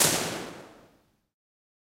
Impulse response of Liverpool Philharmonic Hall.
Liverpool Philharmonic